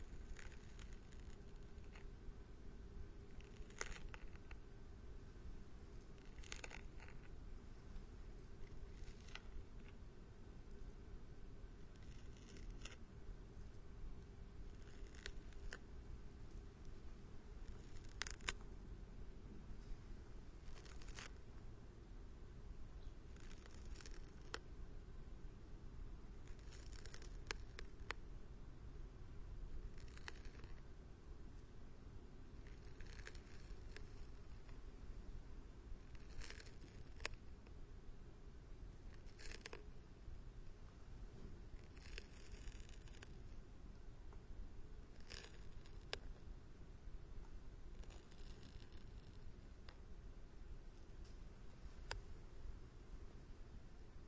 snail munching
One of the rarest and most remarkable sounds on earth: Big escargot (also called Burgundy snail, edible snail or Roman snail [lat. Helix pomatia]) nibbling on a lettuce leave in a flower vase in our kitchen. The faint scratching, rasping noise of the radula is only to be heard from very close. Perhaps this is the only mollusk able to produce a sound. I put the H2 Zoom recorder (highest mic gain) at a distance of approximately 10 cm. As You can imagine there is a high level of ambient noise.
animal-sound, biology-lesson, bite, caracol, eat, escargot, feed, gastropod, helix-pomatia, mollusk, mumble, munch, nibble, radula, rare-sound, rasp, roscon, salad, scrape, snail, weinbergschnecke